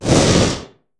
Getting magically hit in a video game. Overprocessing an own recording.
Edited with Audacity.
Plaintext:
HTML: